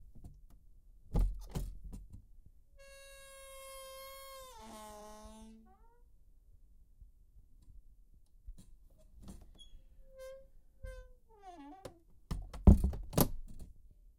Squeaky Door - 111
Here is another sound of a door creaking and squeaking by opening and closing.
Close, Creaking, Slam